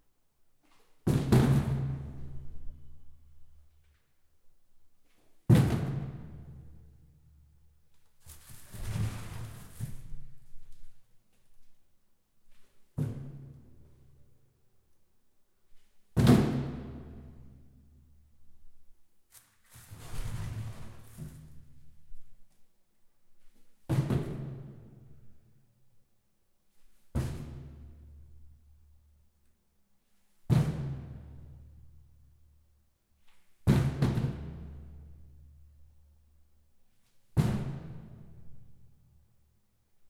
plastic garbage can kicks
Kicking a short garbage can with lid in stairwell, with a lid.
Recorded on a Zoom H4n, cleaned with iZoetopeRx.
field-recording, garbage-can, impact, kick, plastic, thud